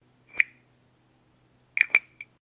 Trinket jar open and close
close, closing, jar, clunk, open, Trinket, opening